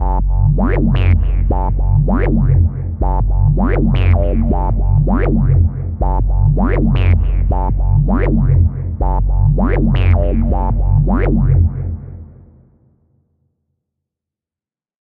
Dark, acidic drum & bass bassline variations with beats at 160BPM